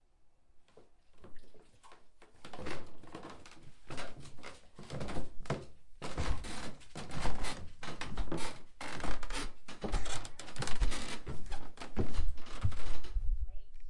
Walking Downstairs
Walking down a flight of stairs.
abstraction, FND112-ASHLIFIORINI-ABSTRACTION, syracuse